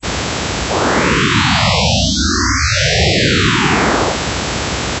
Space mushroom sound created with coagula using original bitmap image.
ambient, space, swell, synth